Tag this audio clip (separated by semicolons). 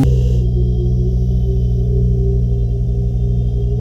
air-travel; Aliens; flight; flying; Game-Creation; Hyperdrive; liftoff; Phaser; scary; soaring; Space; Spaceship; universe